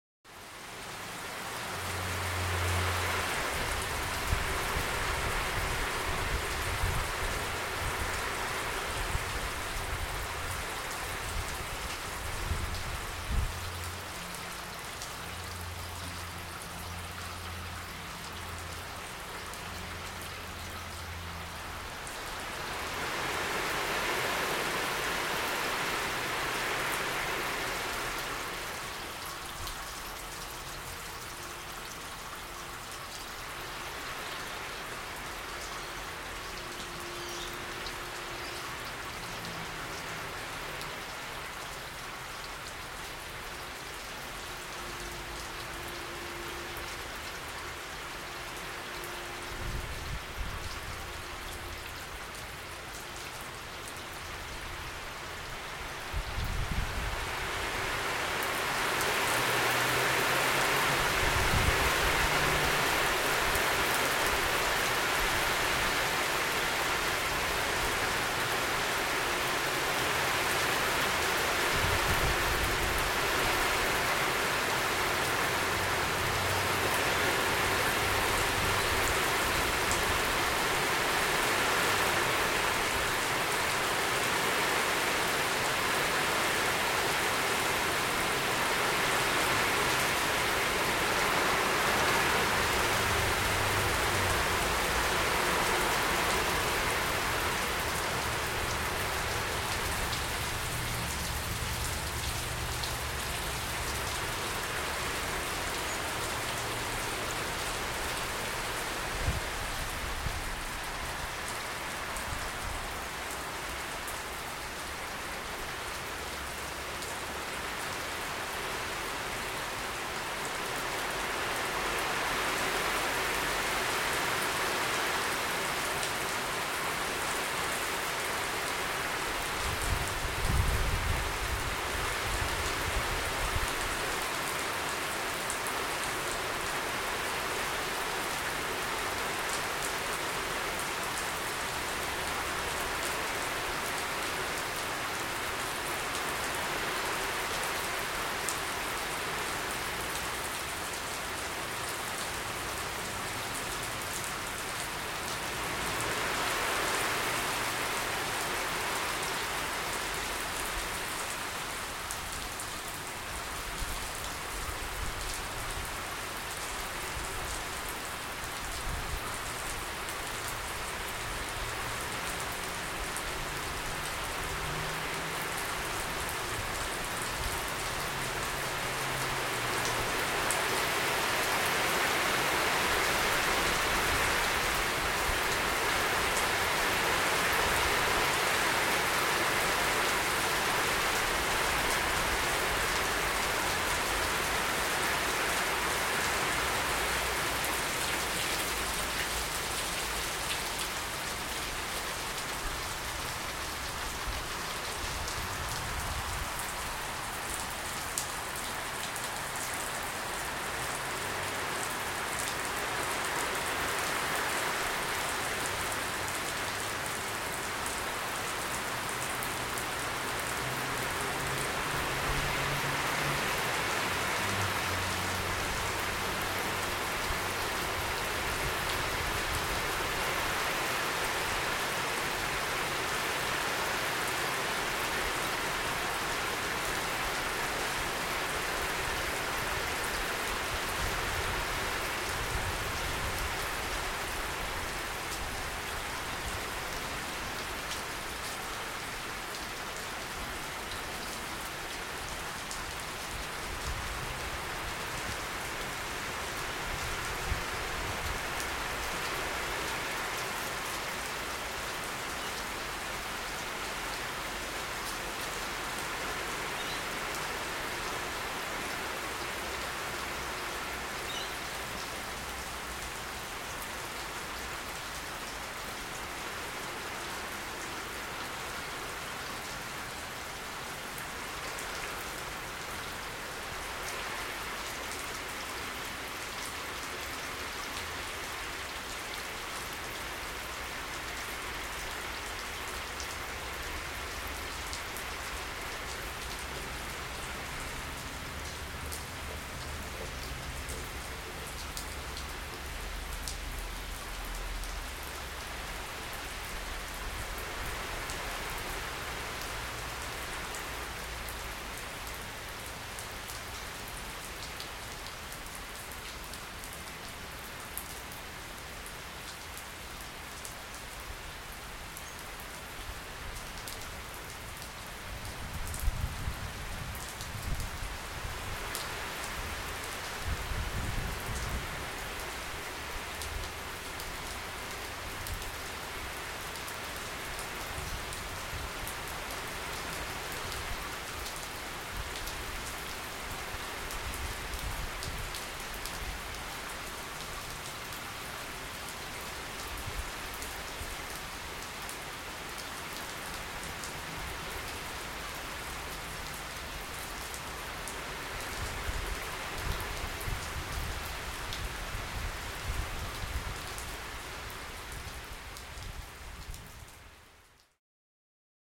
Medium heavy rain 2
background, weather, soundscape, atmos, ambience, atmosphere, rain, atmo, background-sound, ambient